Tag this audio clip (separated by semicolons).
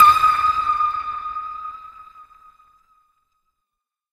millennia; sport; atm; ball; game; sonar; tennis; audio; percussive; processed; sample; pong; preamp; manipulated; ping; technica; note; notes; melodic; asdic; melodyne; tuned